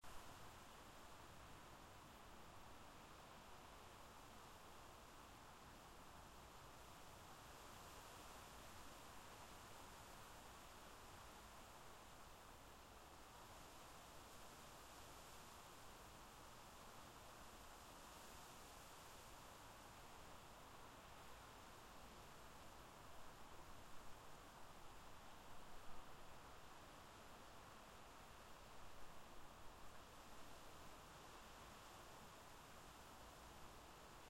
Wind noise in high quality
Wind ,noise, high, quality
high, noise, quality, Wind